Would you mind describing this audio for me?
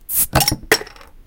Glass bottle open
Opening a glass beer bottle. More fizz sound, and less of the bottle cap falling.